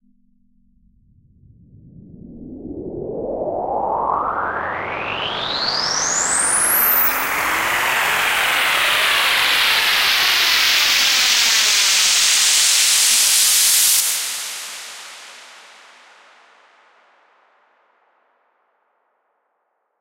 Riser Noise 03b
Riser made with Massive in Reaper. Eight bars long.